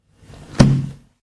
Closing a 64 years old book, hard covered and filled with a very thin kind of paper.
book, household, lofi, loop, noise, paper, percussive